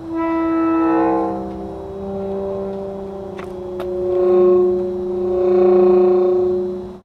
Heavy wrought-iron cemetery gate opening. Short sample of the groaning sound of the hinges as the gate is moved. Field recording which has been processed (trimmed and normalized). There is some background noise.